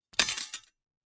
Sword Drop Medium
drop, fall, item, Medieval, Medium, Sword